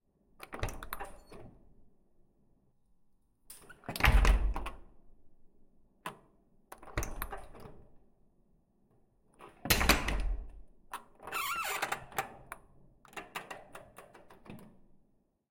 Doors, glass door, frame door, open, close, reverb, door creaking
creak, door, frame, glass, squeak, wood, wooden